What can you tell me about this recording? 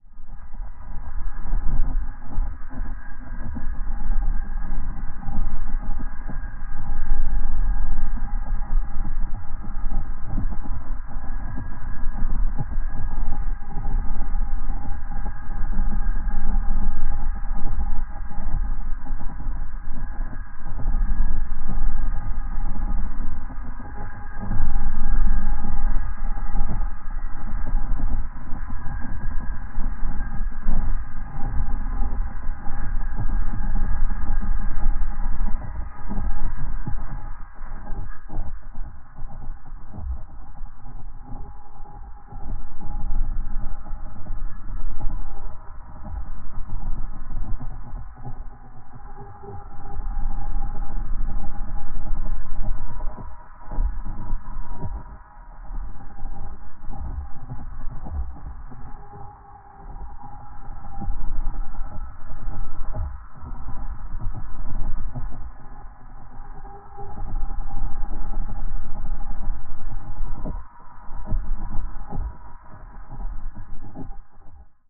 A collection of free sounds from the sound library "Designed Atmospheres".